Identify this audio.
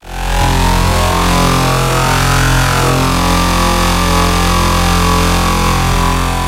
SemiQ leads 15.
This sound belongs to a mini pack sounds could be used for rave or nuerofunk genres
sfx, experimental, sound, fx, sci-fi, effect, soundeffect, sound-design, soundscape